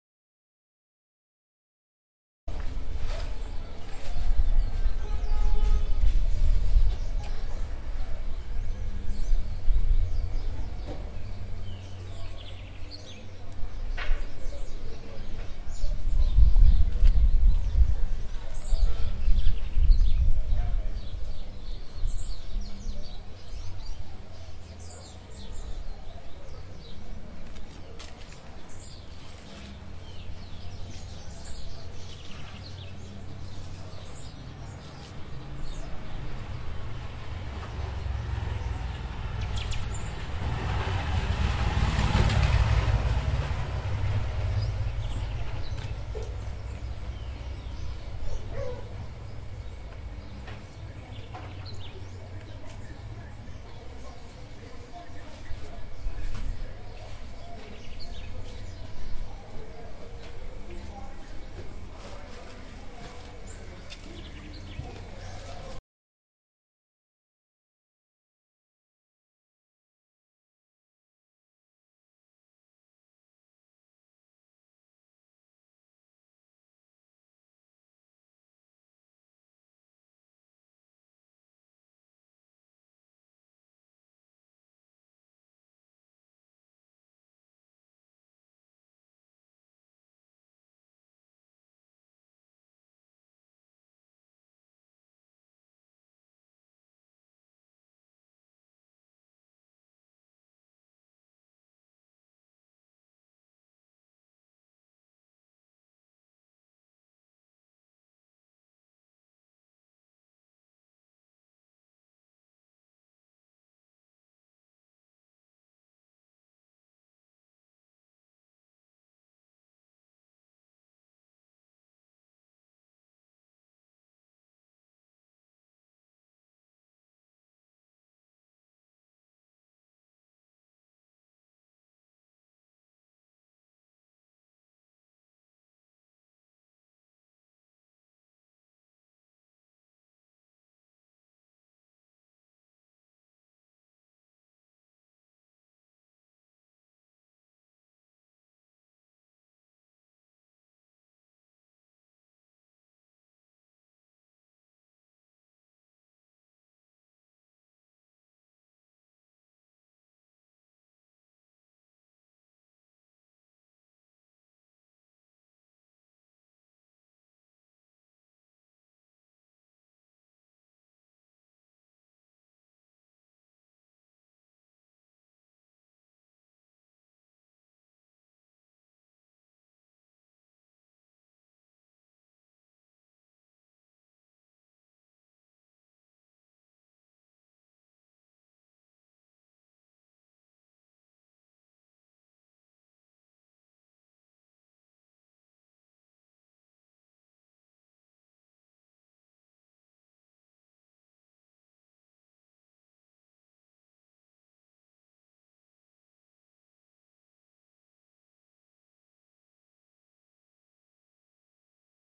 Áudio gravado no começo da escadaria do bairro Rosarinho. Ambiente calmo, porém a escadaria do Rosarinho é caminho para a via expressa. Neste momento, um homem passava carregando uma sacola.
Data: 20/março/2015. Horário: 9h35.
Equipamento utilizado: gravador Tascam DR40.
Gravado por Vinicius Oliveira.
Audio recorded in the Rosarinho neighborhood staircase. The staircase is way to the expressway. At this time, a man passed carrying a bag.
Date: 20/March/2015.
Hours: 9:35 a.m.
Equipament: Tascam DR40.
Recorded by Vinicius Oliveira.
ambience, birds, car, carro, escadaria, field-recording, passados, passos, people, pessoas, Rosarinho, staircase, steps, voices, vozes